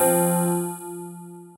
calming bell notification